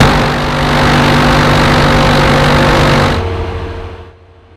M61A2 cannon firing 181 rounds on an F-35 fighter plane. This is the real sound of a modern fighter cannon, not the wimpy nonsense machinegun sounds that are often misplaced in movies and games.
airforce, cannon, F-16, F-35, fighter, gatling, gun, M61, M61A2, military, minigun